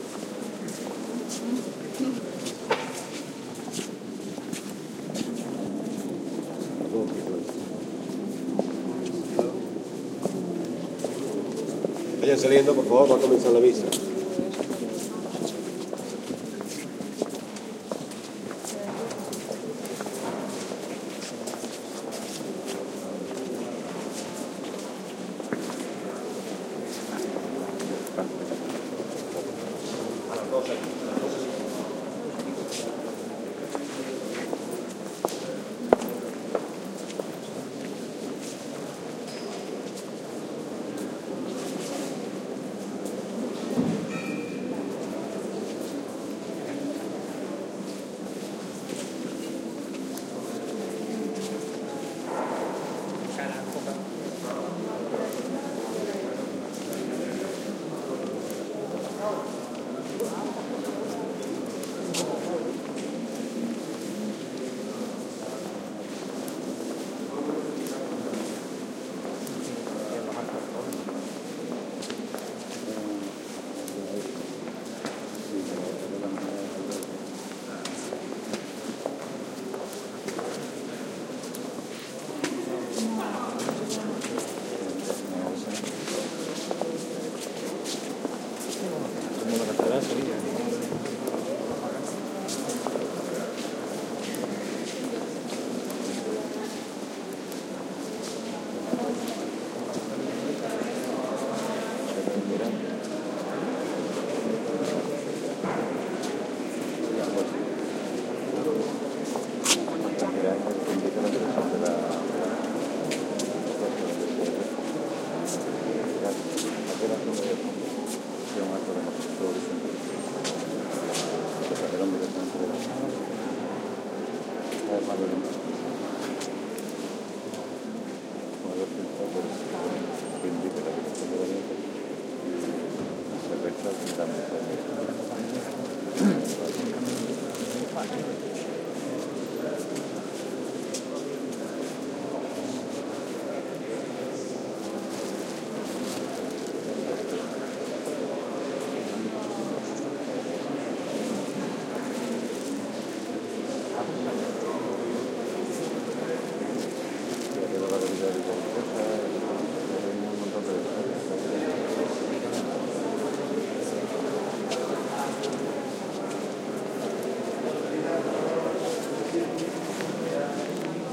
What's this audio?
ambiance inside a large church, with talk (in Spanish), feet dragging, murmurs, reverberation. Recorded at the Cordoba (S Spain) cathedral with PCM M10 recorder internal mics